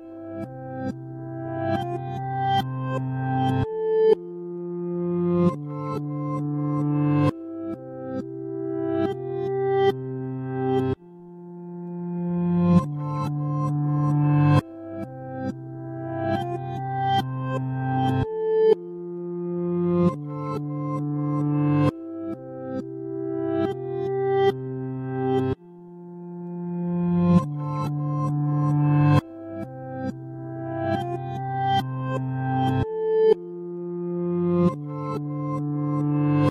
A slow but upbeat and reversed guitar loop I created while testing a software called Sooper Looper. The guitar sound was created with Apple Soundtrack.
ambient, loop, reverse, digital, happy, guitar